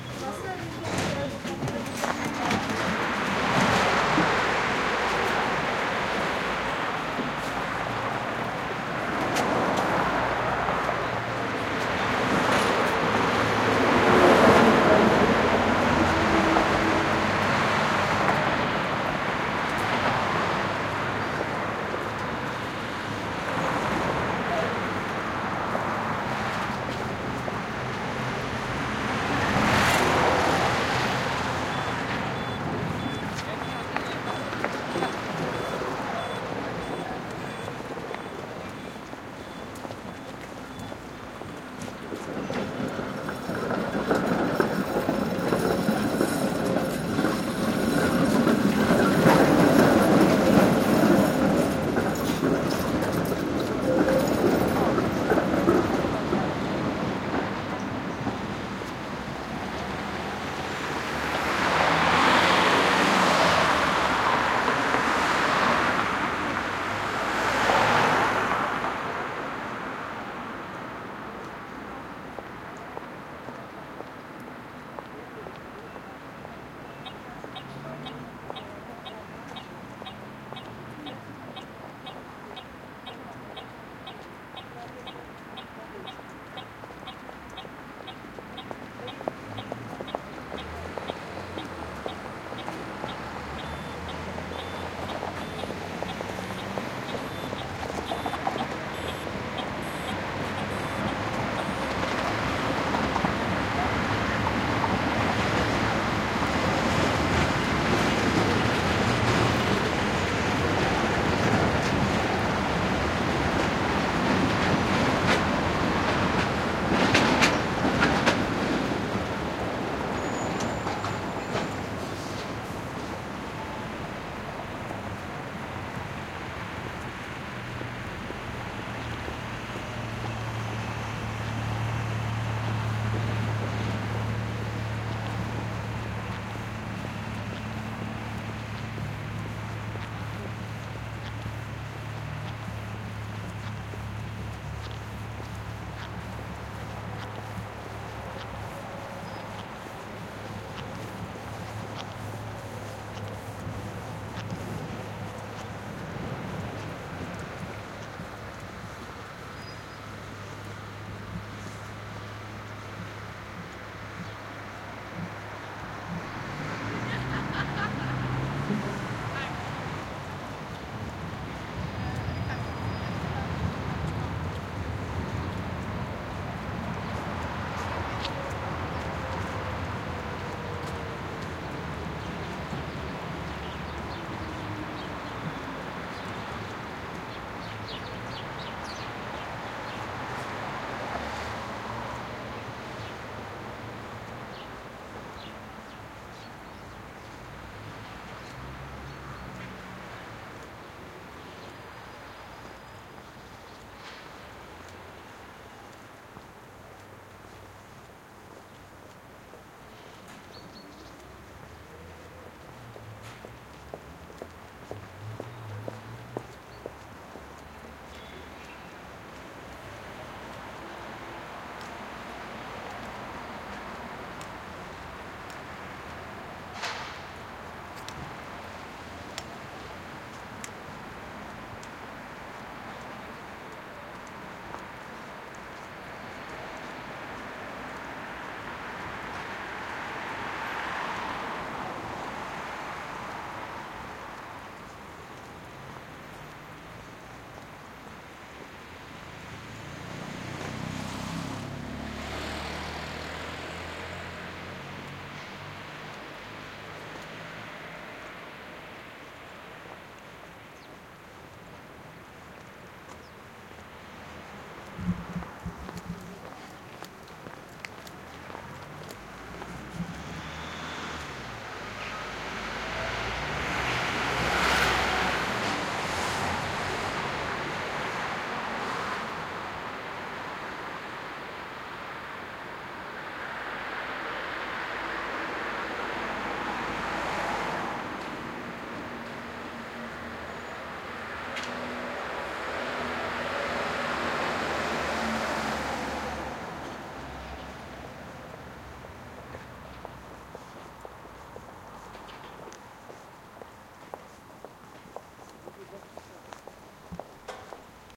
city ambient 01
city ambient, traffic in City Poznan Poland, recorder - zoom h4n
field-recording, soundscape, ambience, atmosphere, noise, ambient, city, traffic